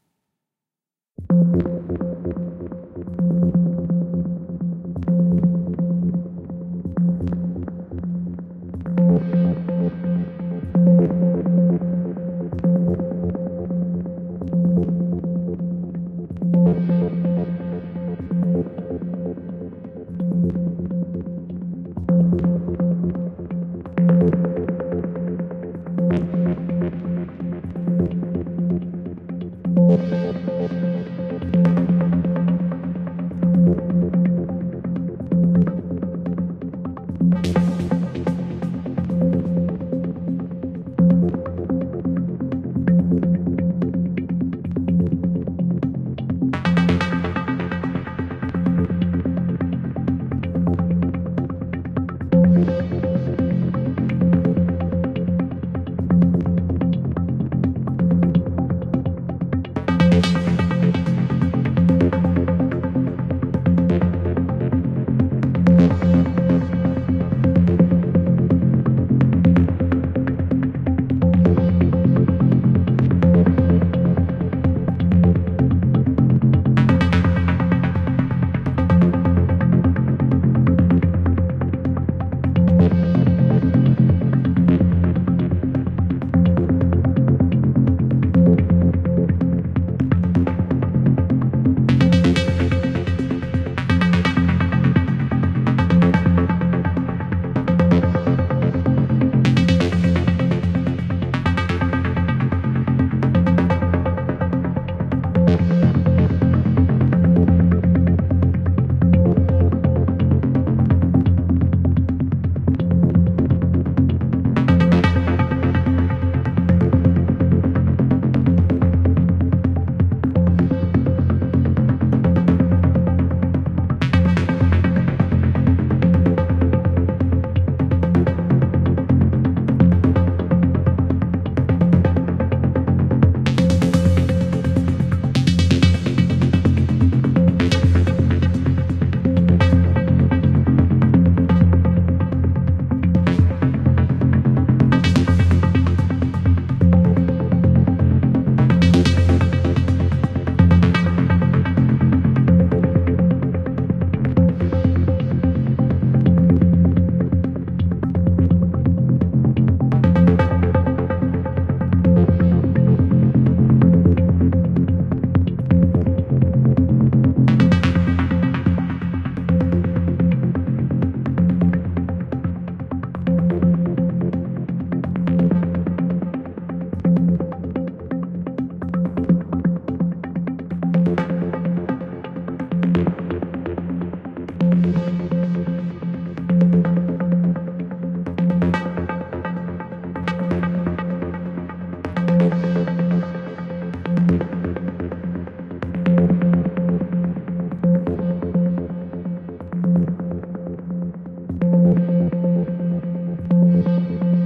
Exploration of deep sounds
exploration of deep frequencies